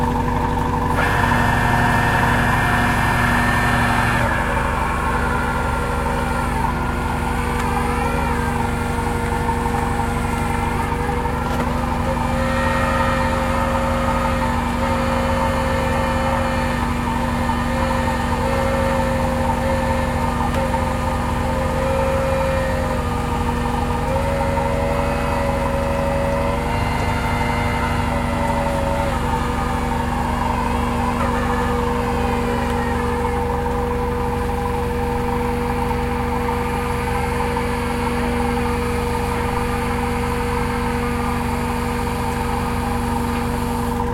Marine cranes moving at sea
Marine cranes, from a punt, moving at sea (sound recorded from a boat with the engine running).
batea, boat, Crane, grua, lancha, mar, sea